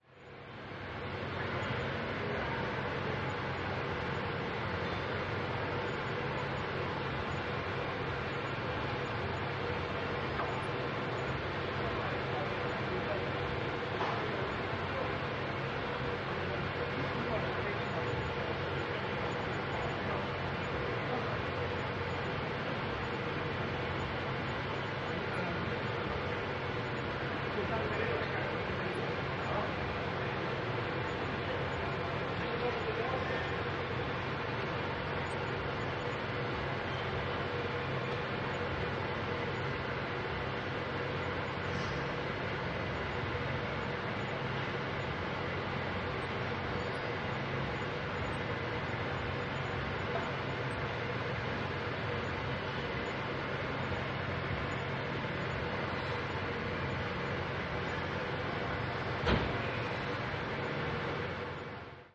Sound of the fan at the rear of a restaurant. The old tenement courtyard in the center of Poznan in Poland (the Old Market Square: crossroads of Old Market street and Wroclawska street). Recording captured about 12.00. 30 May 2013.
Marantz PMD 661 + shure vp88. No processing.
noise, city-center, poznan, fieldrecording, Poland, courtyard, fan